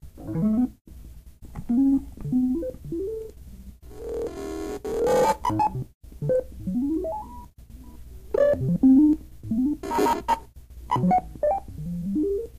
Computer Noises Creep
Created by reversing and forwarding through a Sony Vegas audio file.
audio, computer, digital, electronic, machine, robot